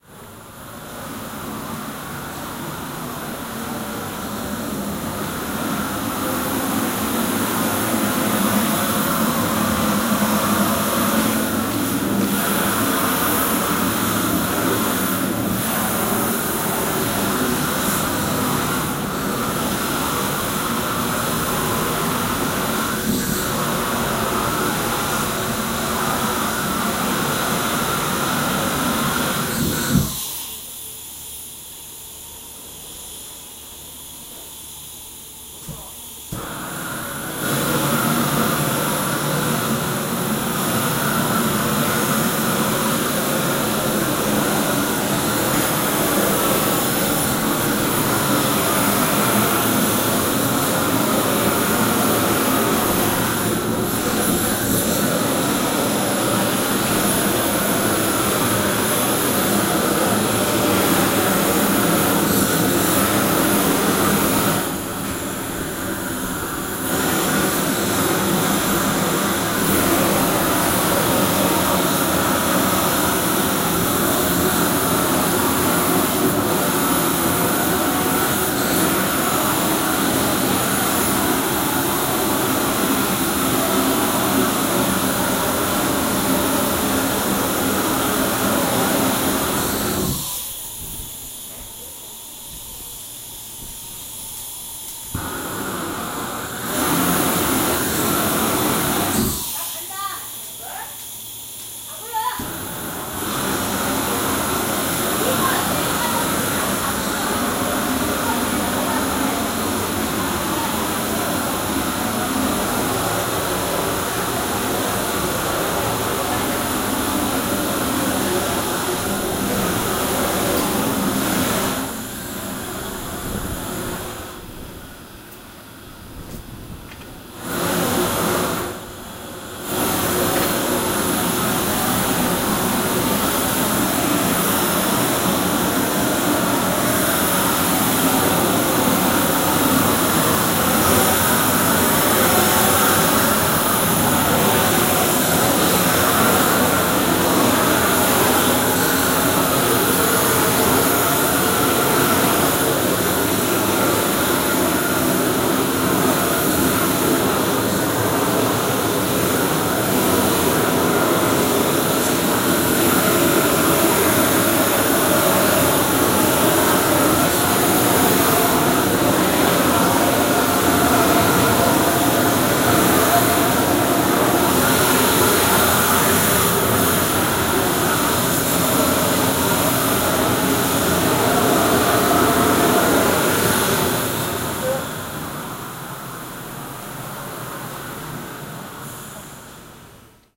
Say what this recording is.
0188 Yeongdeungpo Market Blowlamp
Market. Blowlamp. People talking Korean.
20120215
blowlamp, field-recording, korea, seoul